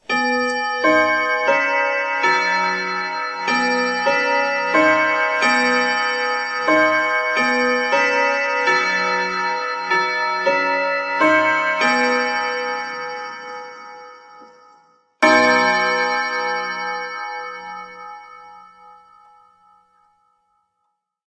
This is cleaned version of acclivity's original. I've removed most of the background mechanism noises and left the pure chimes.
bells, clock, chimes, chiming, grandmother